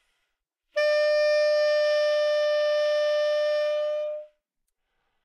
Part of the Good-sounds dataset of monophonic instrumental sounds.
instrument::sax_tenor
note::D
octave::5
midi note::62
good-sounds-id::5028